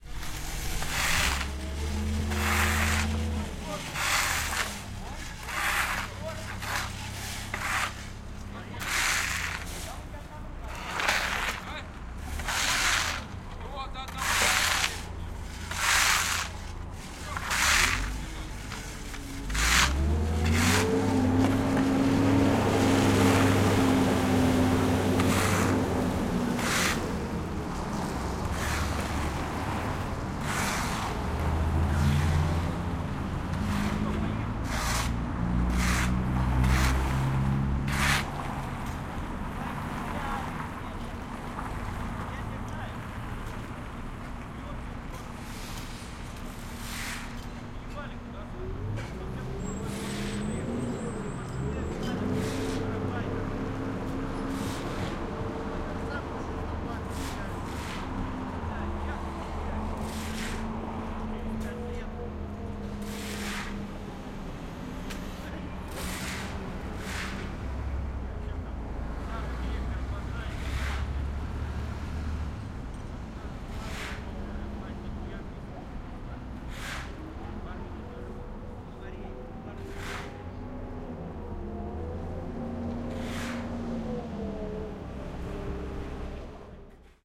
XY City Night sidewalk building russian speech
Recorded: 2017.03.23
Device: ZOOM H6 (XY mic)
A plodders works with shovels and talking in the night Moscow (Strogino district).
building, construction, plodders, shovel, voices